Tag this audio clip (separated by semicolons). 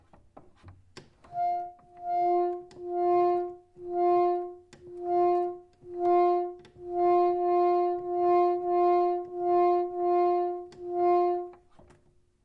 reed; note; organ; pump; f